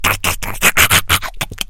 A voice sound effect useful for smaller, mostly evil, creatures in all kind of games.
arcade, creature, fantasy, game, gamedev, gamedeveloping, games, gaming, goblin, imp, indiedev, indiegamedev, kobold, minion, RPG, sfx, small-creature, Speak, Talk, videogame, videogames, vocal, voice, Voices